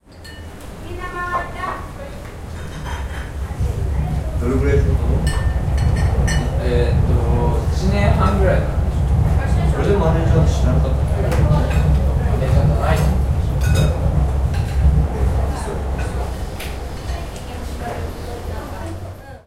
0396 Subway over restaurant
Subway over the restaurant. People talking in Japanese. Cutlery.
20120807
subway, cutlery, japan, field-recording, tokyo, restaurant, japanese